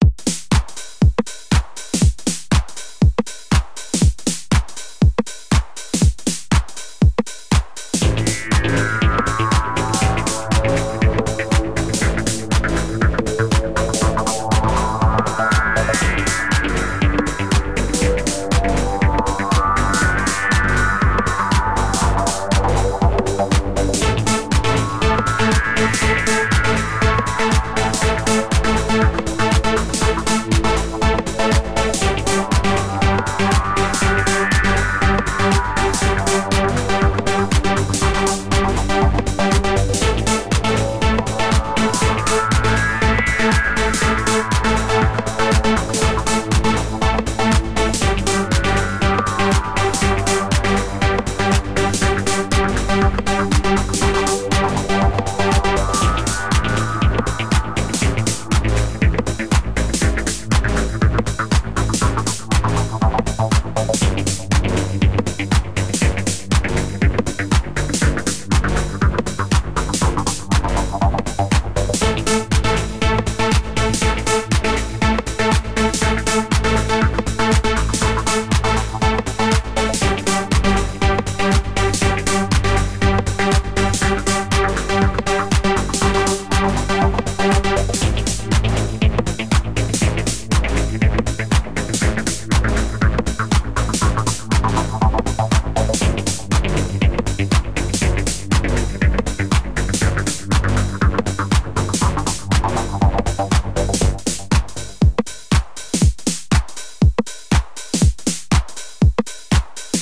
This is background music made by Gumpy!
You can use this in your YT-videos.
OUR CHANNEL: ProAnanas
PROGRAMS USED: Cubase.